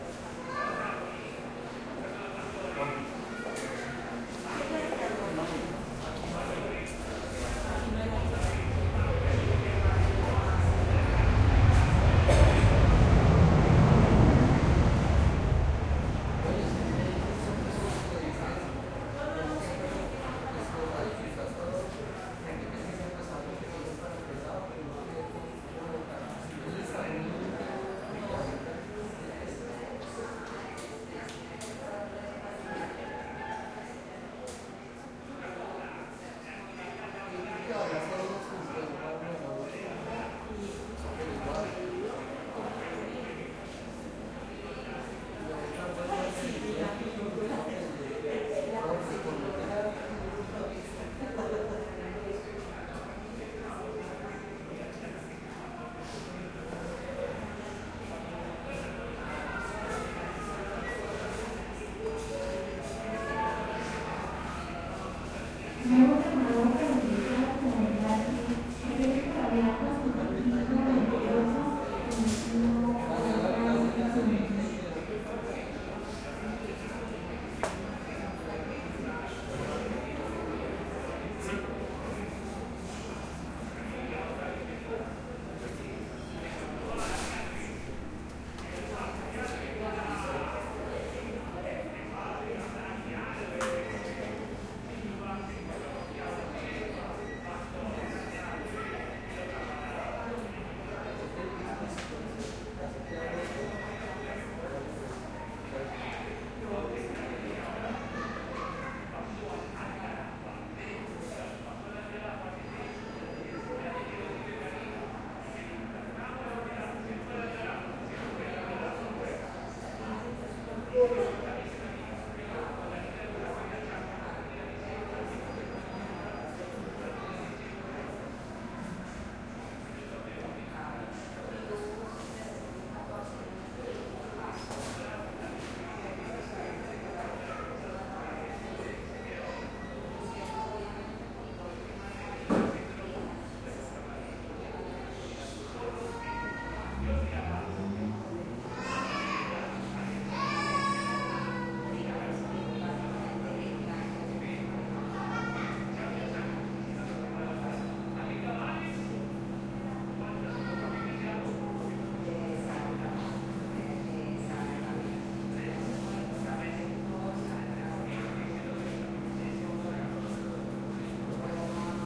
airport small waiting room light crowd kid Pasto Narino, Colombia3 plane takeoff bg start, PA voice middle
airport small waiting room light crowd kid Pasto Narino, Colombia plane takeoff bg start, PA voice middle
airport, crowd, light, room, small, waiting